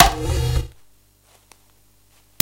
The dungeon drum set. Medieval Breaks
amen, dragon, medievally, idm, dungeons, rough, breaks, breakcore, breakbeat, medieval